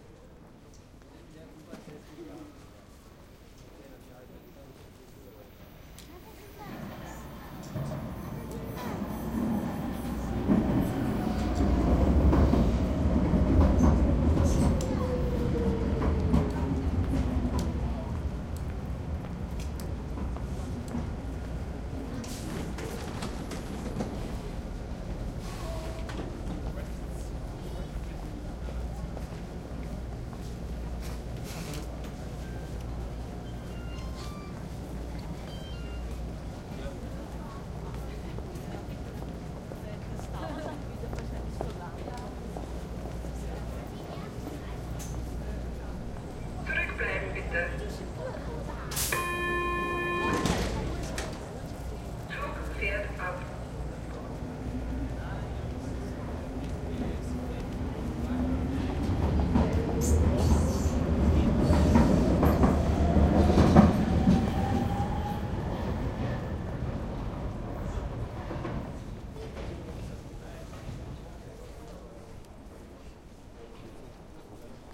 Karlsplatz 2b Ubahn Einf
Recording from "Karlsplatz" in vienna.
field-recording, people, vienna, karlsplatz